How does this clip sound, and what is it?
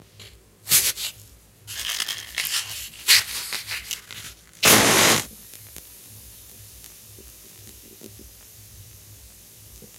Ignition of matches
fire, cigarette, matchbox, matches, smoking, burning, flame, lighter, light